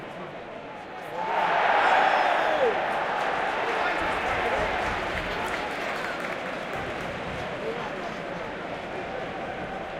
Football-match Crowd Cheer Ambience .stereo
Starts and builds with a cheer that is rather distant.
Recording of the football game at Wimbledon Stadium, sitting in the upper stands so the main body of the cheering crowd is rather distant leading to some losses in the high frequency bands.
Recorded in stereo with spaced A/B Omni
soccer, cheers, fans, shouting